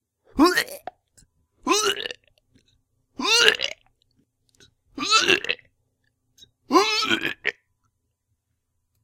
Throw-up noises, woohoo!